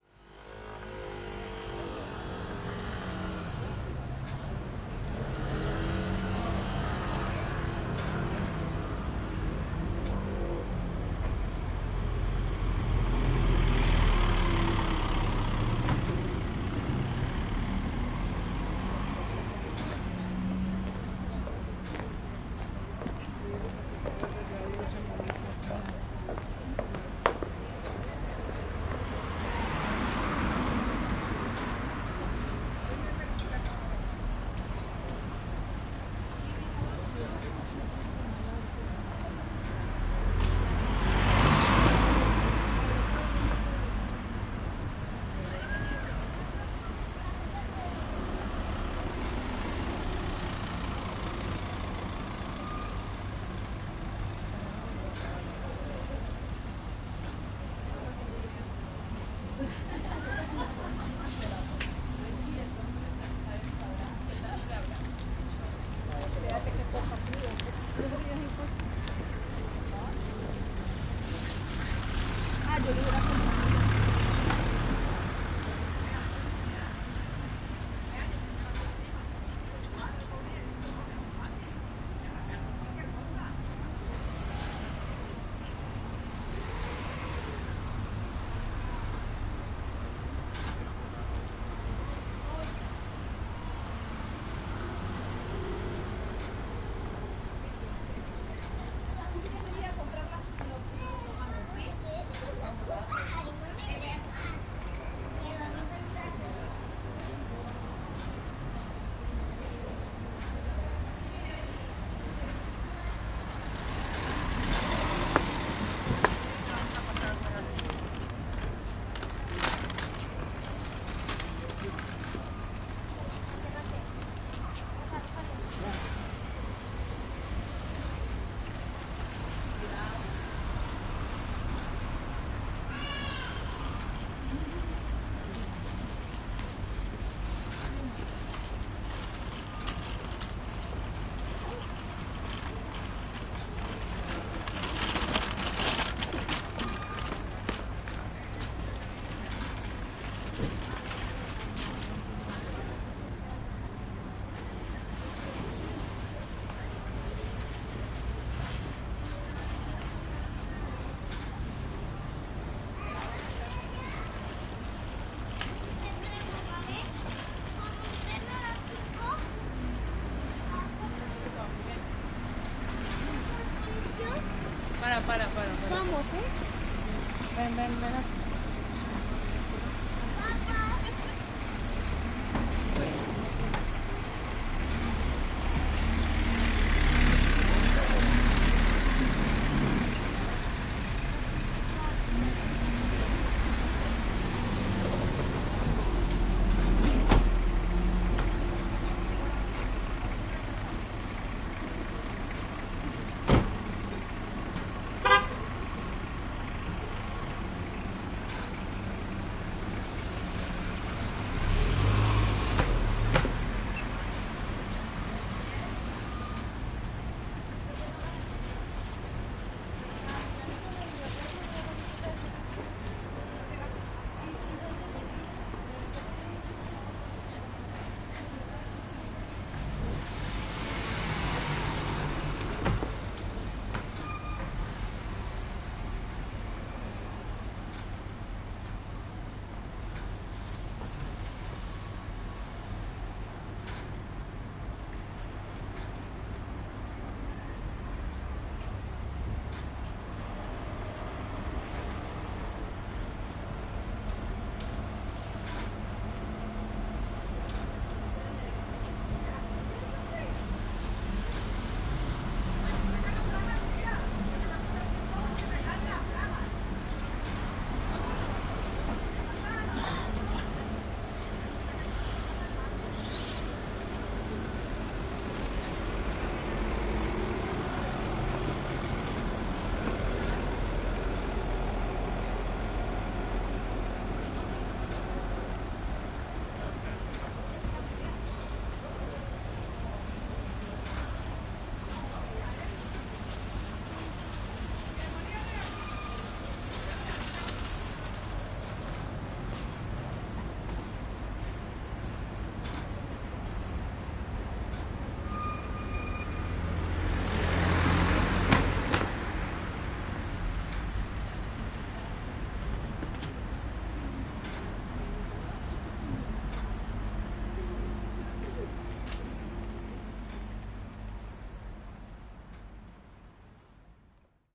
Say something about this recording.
Supermarket outside
This sound was recorded outside a mall. The environment includes the sounds of people talking and cars driving on the road.
ambience, cars, cart, footsteps, motorcycle, outside, shopping, voices